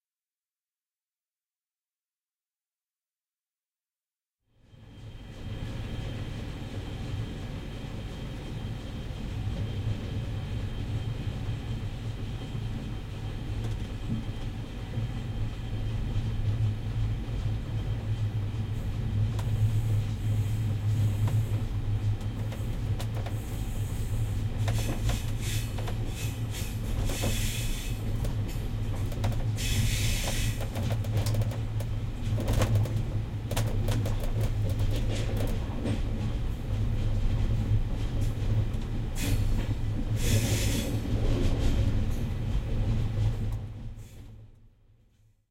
talgo lusitaria
This is an inner recording of Talgo Madrid-Lisbon. It was at night. It is a train Talgo (serie 4), "gran clase" individual room. This recording was made with a Mini Disk HMDI with independent stereo microphone and slightly altered in the PC. (Sorry, the correct name of this file is Lusinia espress)
train, express, talgo, travel